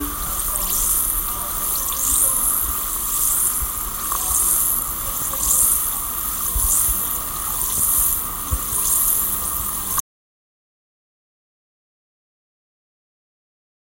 an effervescent tablet in a glass with water. Zoom H1 recorder